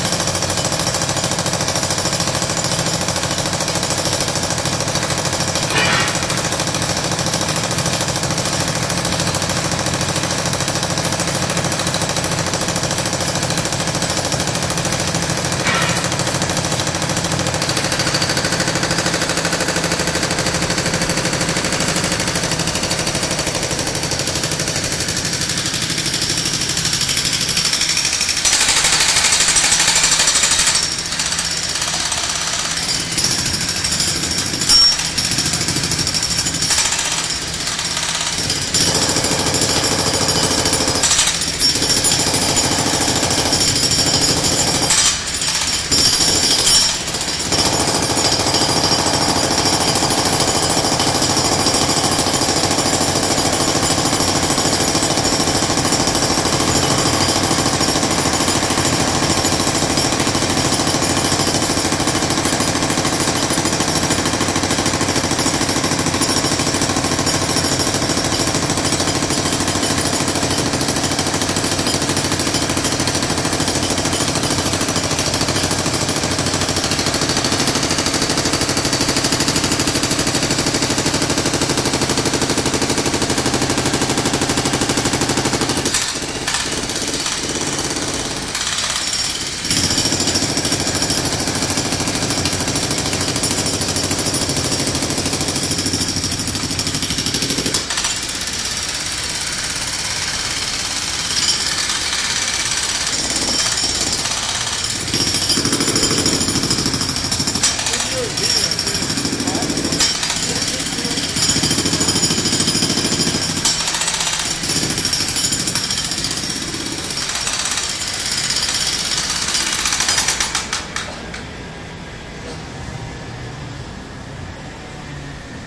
construction jackhammer echo narrow street
construction, echo, jackhammer, narrow, street